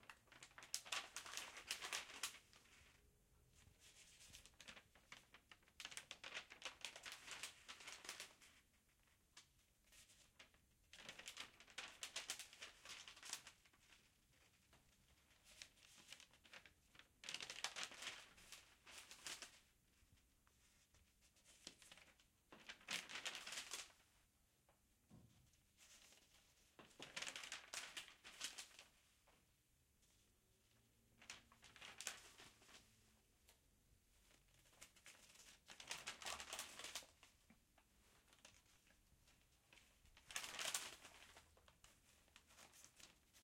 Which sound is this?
Turning pages of a newspaper.
{"fr":"Tourner les pages d'un journal 2","desc":"Manipulation d'un journal en papier.","tags":"journal page tourner papier"}